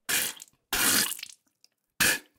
Recording of spitting liquid. Recorded using a Sennheiser 416 and Sound Devices 552.